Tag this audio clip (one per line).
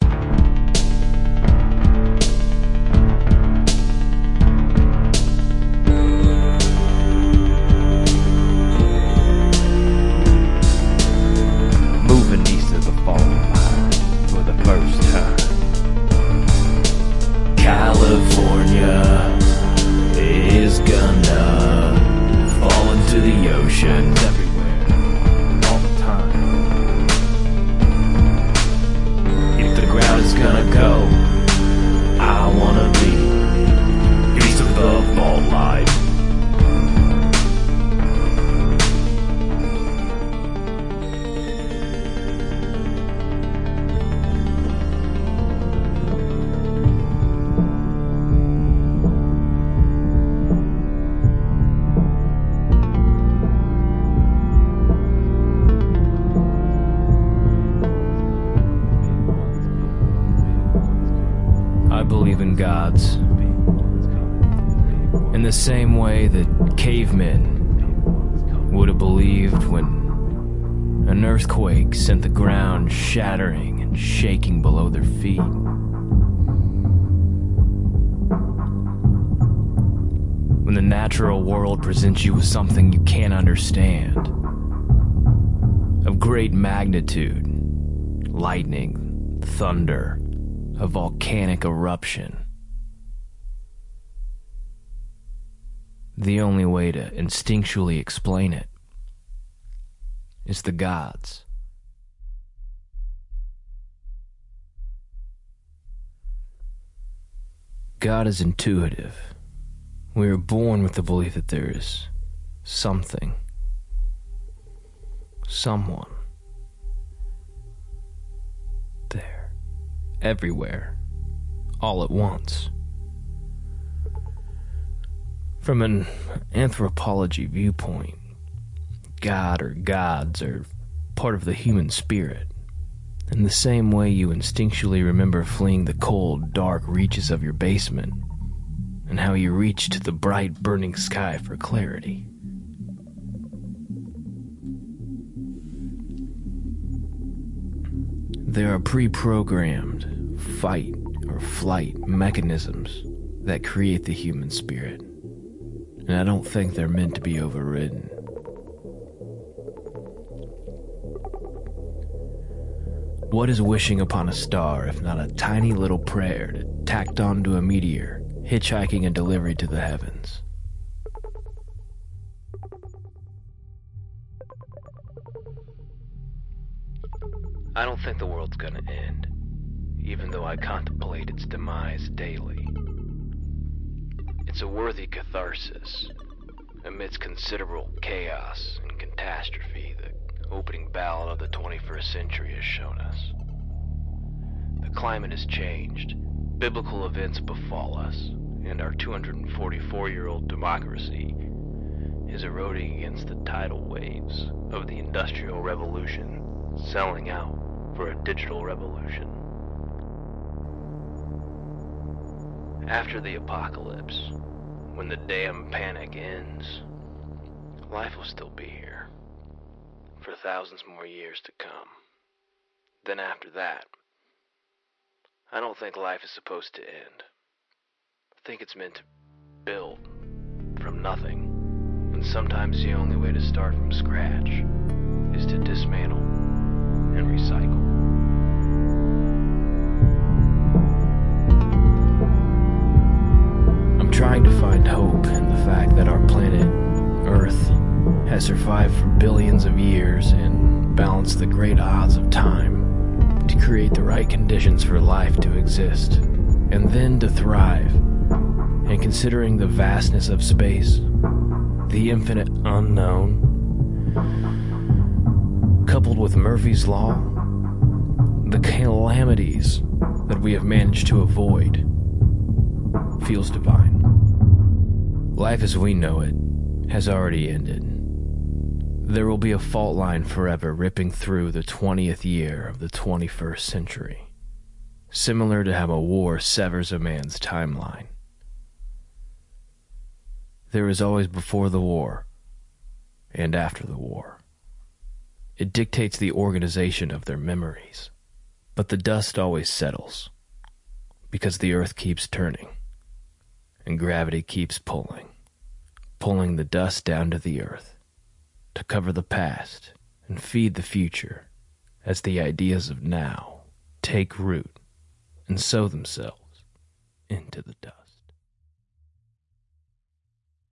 Armageddon
California
God
Gods
Nostradamus
above
below
earth
earth-quake
flood
folktronica
heaven
mud
music
poem
poetry
predict-the-future
predictions
prophecy
quake
rock
rock-n-roll
roll
shoegaze
so
spoken
the-big-one
word